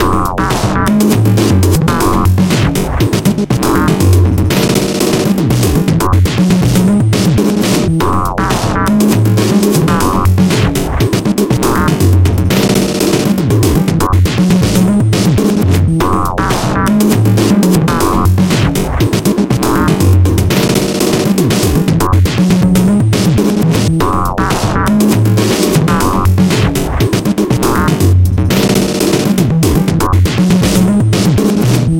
Crazy rhythm loop 120 BPM 005
First rhythmic layer made in Ableton Live.Second rhythmic layer made in Reactor 6,and then processed with glitch effect plugin .
Mixed in Cakewalk by BandLab.
abstract, broken, chaotic, crazy, drum, futuristic, glitch, loop, rhythm, rhythmic, scratch, sound